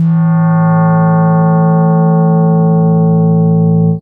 Created in Csound. Combination of FM sweep and pluck opcode a 5th apart.